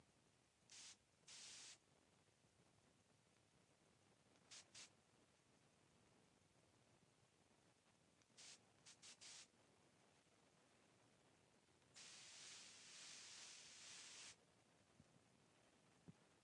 air freshener spray
an air freshener
spray, air, spritz, clean